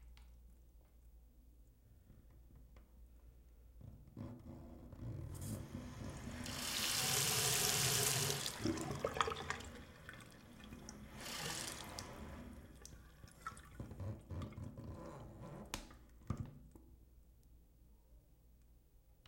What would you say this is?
Running the faucet, take 2.
drain, faucet, sink, water, bath, room